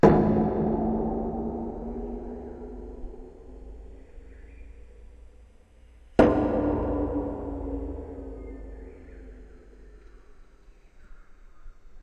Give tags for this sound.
field-recording; hit

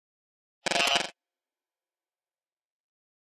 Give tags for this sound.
digital; electronic; glitch; lo-fi; noise; pink-noise; processed; scrub; sound-design; strange; sweep; synth; white-noise

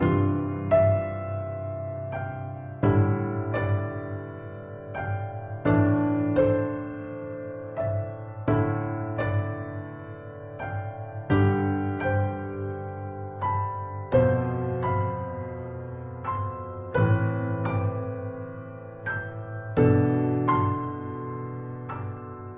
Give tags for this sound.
acoustic; loop

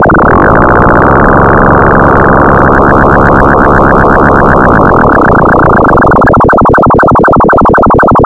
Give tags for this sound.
flight-maneuver; vintage; scifi; ship; synth; flight

